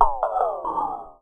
as always, sounds are made on linux using the various softsynths and effects of the open source community, synthesizing layering and processing with renoise as a daw and plugin host.
abstract, artificial, bleep, computer, digital, effect, electric, future, futuristic, game, glitch, noise, notification, robotics, sci-fi, sfx, sound-design, UI